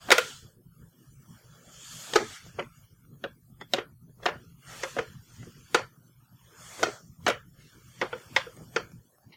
This is the sound of a person moving back and forth while sitting on an old dining room chair.